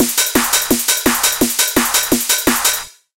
Rhythm 2c 170BPM
Without kick drum. Hardcore 4 x 4 rhythm for use in most bouncy hardcore dance music styles such as UK Hardcore and Happy Hardcore